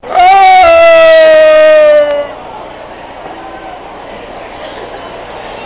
nikon naturalhistory3
Audio extracted from the video of a Nikon Coolpix L11 camera. This is me making loud noises at the dinosaur exhibit in the natural science museum in DC. The video is much funnier.
lofi, museum, nikon, yelling, camera, noise